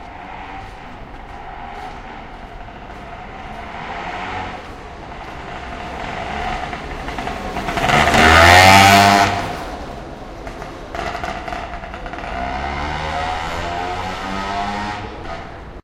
scooter, the noisy kind. Recorded with cheap Aiwa omni stereo mic and iRiver iHP120/ motillo, de las ruidosas